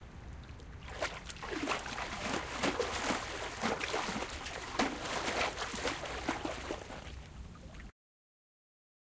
pool sound 2
Splashing in pool. Sony ECM-99 stereo microphone to SonyMD (MZ-N707)